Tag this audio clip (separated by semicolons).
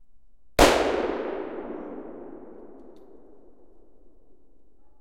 explosion; gun; rifle; hunt; projectile; firing; fire; shoot; shot; gunshot; weapon; shooting